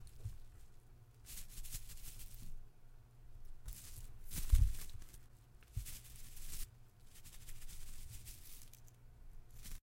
10 -Movimiento hojas
sonido de algo urgando en arbustos